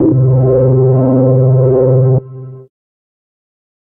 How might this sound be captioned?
Alien Alarm: 110 BPM C2 note, strange sounding alarm. Absynth 5 sampled into Ableton, compression using PSP Compressor2 and PSP Warmer. Random presets, and very little other effects used, mostly so this sample can be re-sampled. Crazy sounds.
glitch, synthesizer, acid, techno, noise, electronic, trance, electro, bpm, dance, house, sci-fi, resonance, hardcore, atmospheric, club, glitch-hop